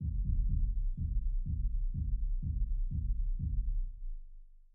Distant Rave Music
This is the first part in my series of "Distant Music"
This is intended to sound like Rave/House/Hardcore music being played loudly in the background. A very basic generic thump thump type of music.
distant, bass, house